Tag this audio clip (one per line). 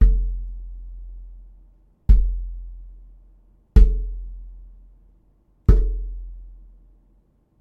office
plastic-bottle
coller
water-cooler-bottle
office-cooler
boom